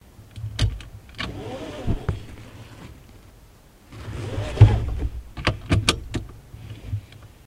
Seat belt unbuckle & buckling
seatbelt, safety, belt, car, click, unclick, buckle, unbuckle